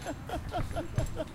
man laughing/giggling outdoors